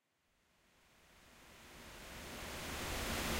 techno fx-004
techno fx house noise dispersal
dispersal, effect, fx, house, noise, techno